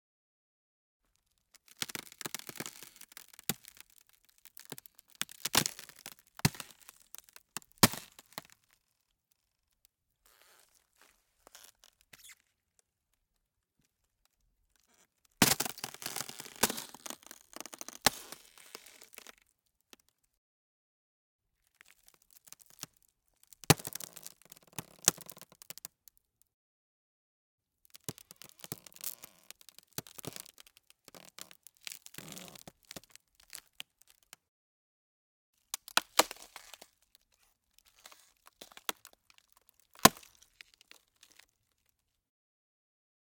Ice sheet about 8mm thick being slowly stepped on to give a cracking and creaking noise. Applied 25Hz high pass filter, no limiting or normalizing.
Rode M3 > Marantz PMD-661